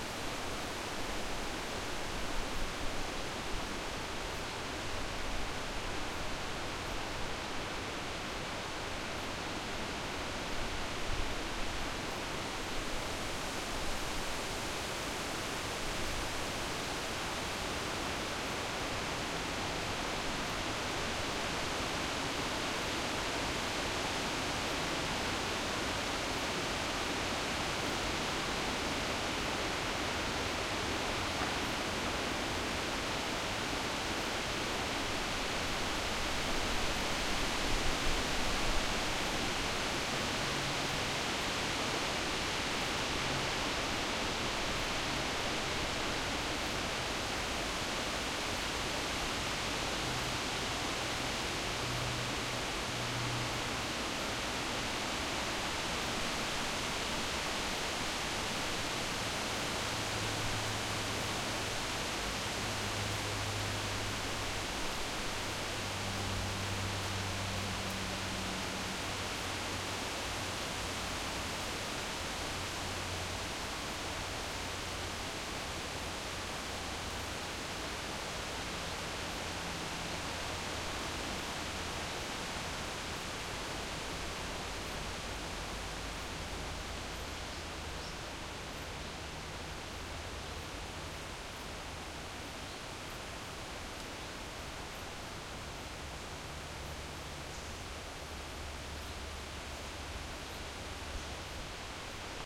wind in the trees
Short clip of wind in some trees and a distant plane. PCM-D50 recorder with Rycote windshield.
field-recording, trees, wind, windy